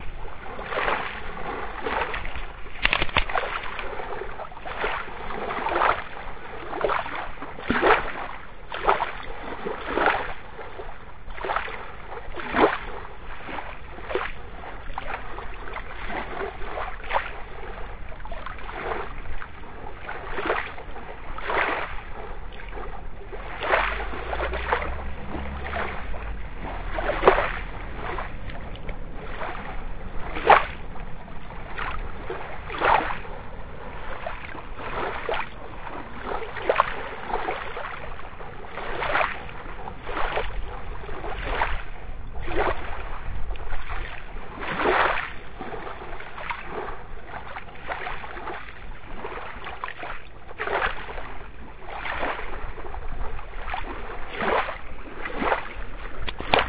from selimiye in turkey, nice places, nice sounds...